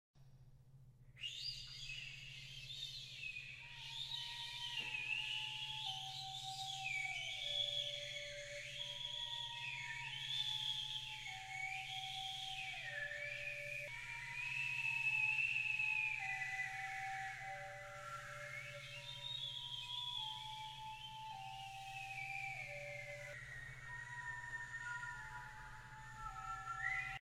Creepy and Dark
This is a sound I made to describe someone wandering through a creepy forest.
Creepy, dark, fear, haunted, horror, scary, sinister, spooky, suspense, terror